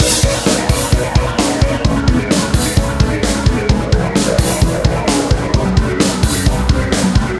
Loopable eletro hit made in FL Studio.
2021.